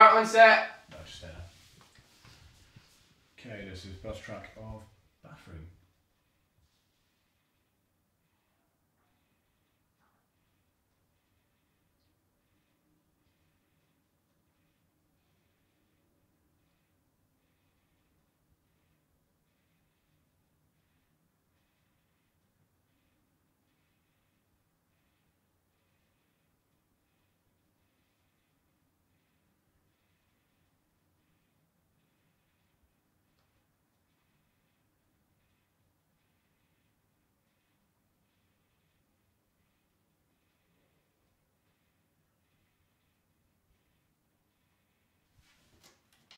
BUZZ TRACKTBATHROOM 1
Ok so most of these tracks in this pack have either been recorded whilst I have been on set so the names are reflective of the time and character location of the film it was originally recorded for.
Recorded with a Sennheiser MKH 416T, SQN 4s Series IVe Mixer and Tascam DR-680 PCM Recorder.